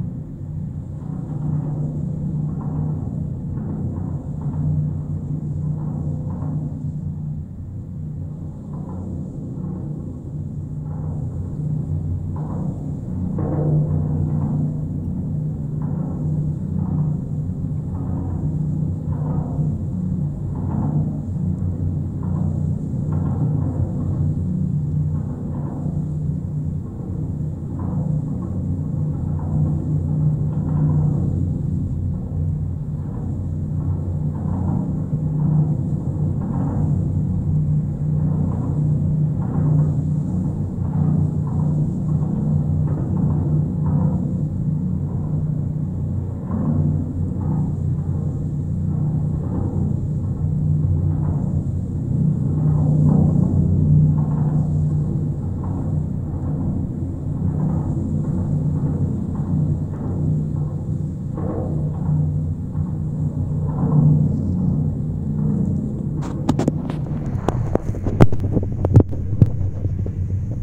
GGB A0220 suspender NE12SW
Contact mic recording of the Golden Gate Bridge in San Francisco, CA, USA at the northeast approach, suspender #12. Recorded October 18, 2009 using a Sony PCM-D50 recorder with Schertler DYN-E-SET wired mic.
bridge, cable, contact, contact-mic, contact-microphone, DYN-E-SET, field-recording, Golden-Gate-Bridge, metal, microphone, Schertler, Sony-PCM-D50, steel, wikiGong